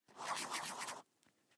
Drawing a sprial with an Artline 204 FAXBLAC 0.4 fineline pen. Recorded using an AKG Blue Line se300b/ck93 mic.